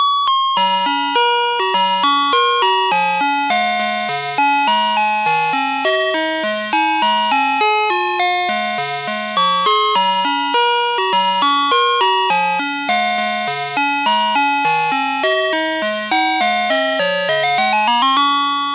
Redwing Digital II Chime Song 8.
chime, cream, Ice